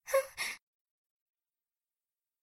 Cute scared little girl gasp
Scared gasping of a girl for video games clear and HD.